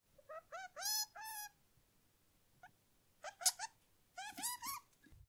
10-01 Degu Squealing Soft
CZ, Czech, Pansk, Panska